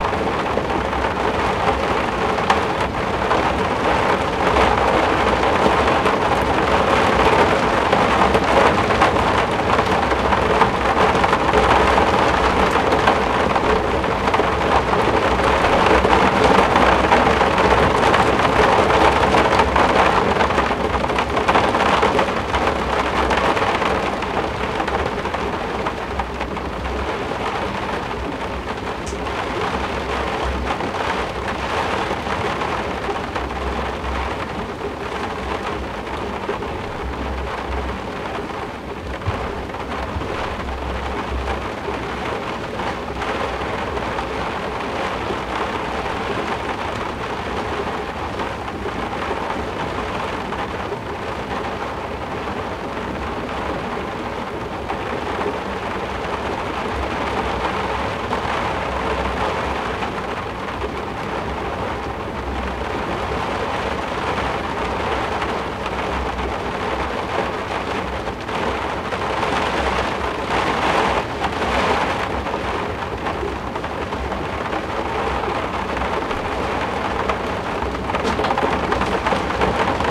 dakraam regen II
Recording of a roofwindow during a bit of a rain storm. Sennheiser MKH-415T -> Sound Devices 722 -> light eq and normalisation in Adobe Audition.
window, water, rain, weather, drip, field-recording